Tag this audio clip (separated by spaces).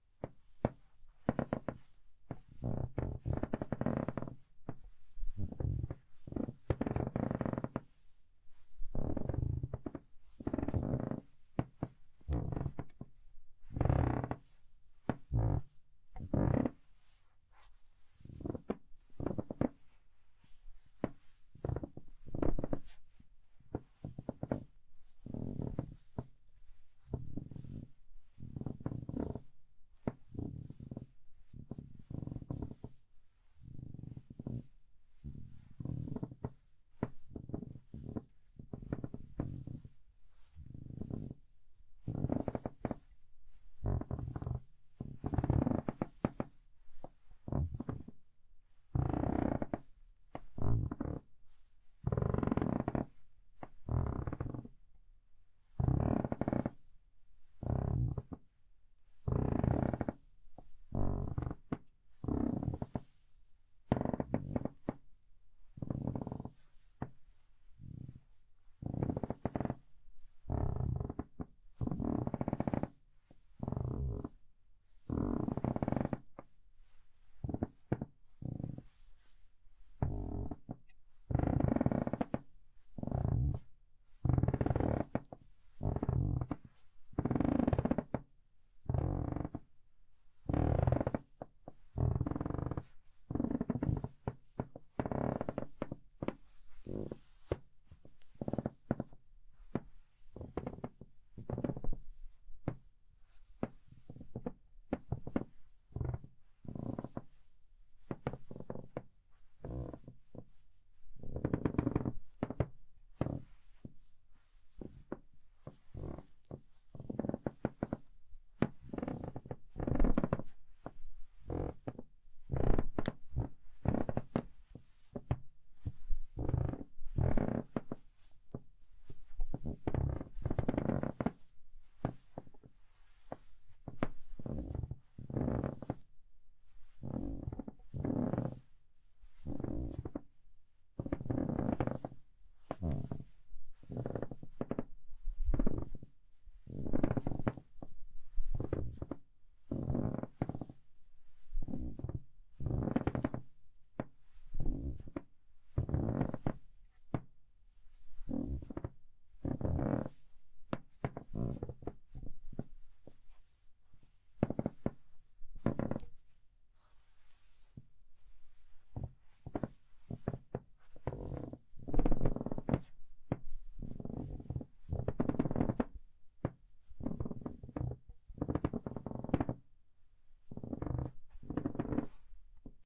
creak creaking floor-board